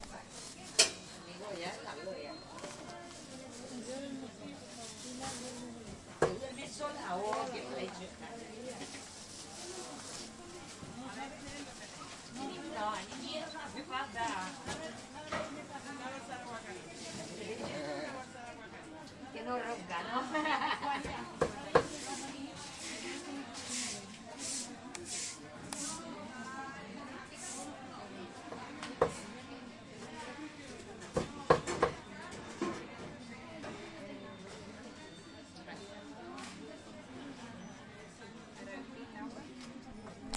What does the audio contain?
Fishmonger's at Mercat de Sant Carles
In the market Sant Carles, we hear the fishmonger selling fish and we also hear the cash register.
Al mercat de Sant Carles de Granollers escoltem un peixater venent peix i el so d'una caixa registradora.
Granollers, cash, field-recording, fish, fishmonger, market, register, s